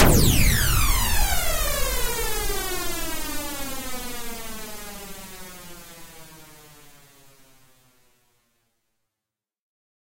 MASSIVE PHASE CRASH 01
Synthesized and phase-swept noise crash created in Cool Edit Pro.
phasing, crash, phase, noise, boom